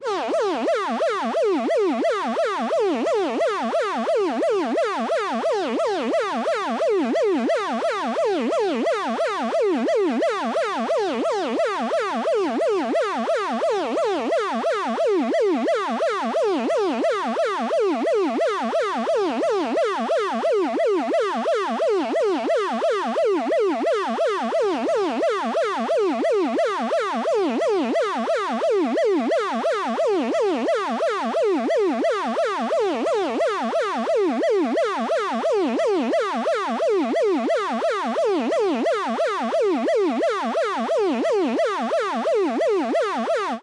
Police Siren (Synth, cartoonish)
The sound is mono despite the stereo file